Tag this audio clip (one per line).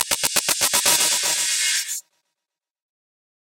broadcasting
effect
imaging
sound